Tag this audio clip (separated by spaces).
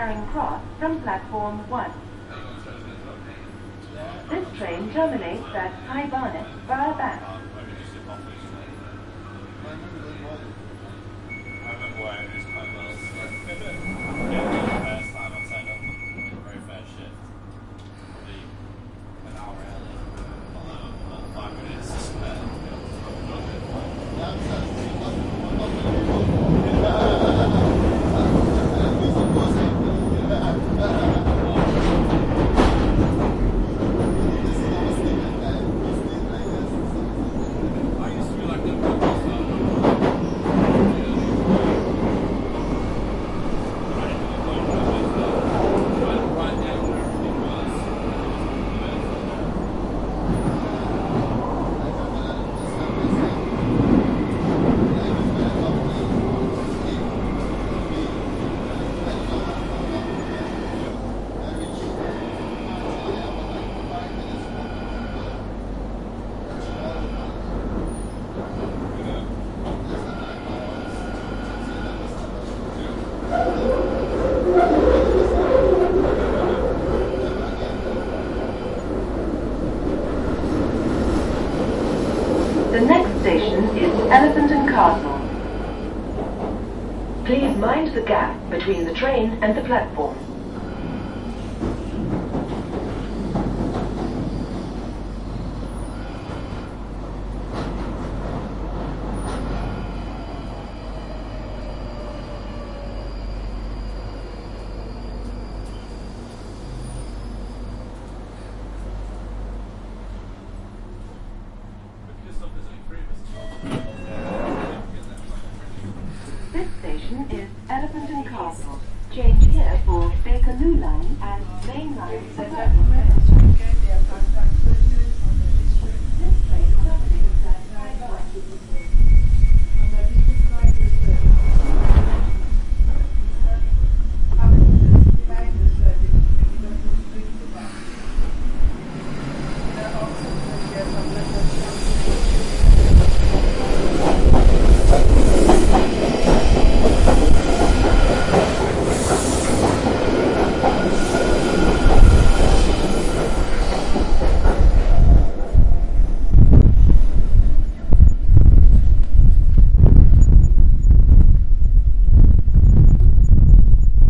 ambience ambient background field-recording London-underground Northern-Line passengers tube